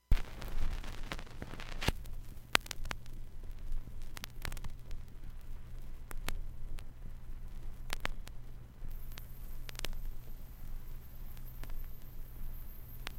A collection of stereo recordings of various vintage vinyl records. Some are long looping sequences, some are a few samples long for impulse response reverb or cabinet emulators uses. Rendered directly to disk from turntable.